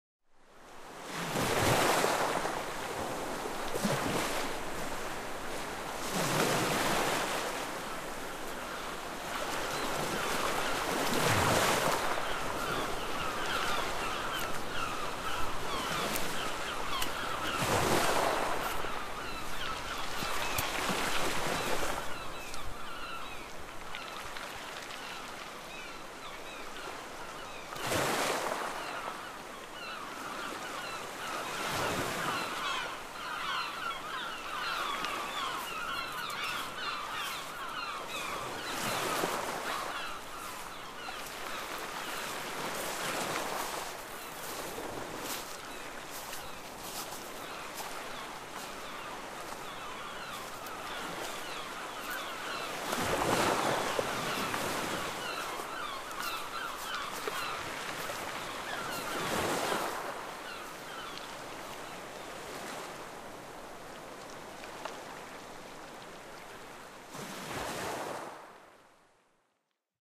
Sea and Seagull, wave
It is the sound from seaside of Gümüsdere in Turkey. In winter seagulls and strong waves.
seaside, beach, wind, wave